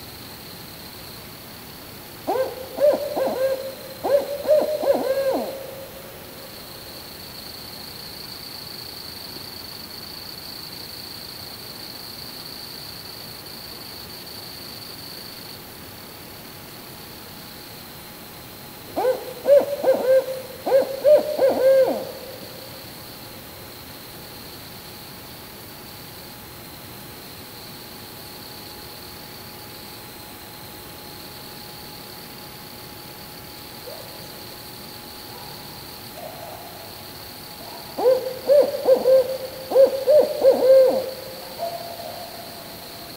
Gallant.Alabama.BarredOwl
A Barred owl vocalizing at 3am from the wooded area next to my home.
Barred, bird, birds-of-prey, calling, hoot, nature, nocturnal, noises, outdoor, owl, sounds